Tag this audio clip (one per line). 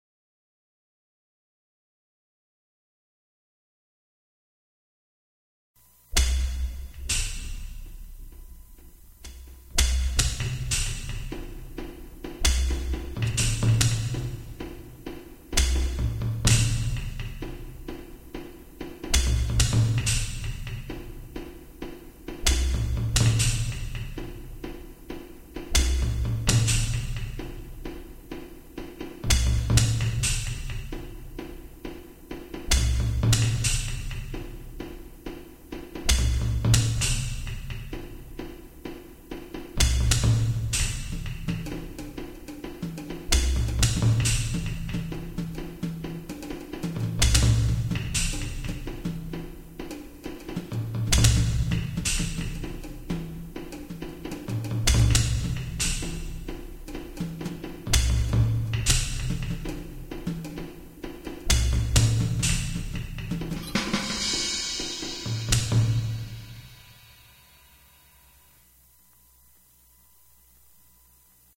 bongo
samples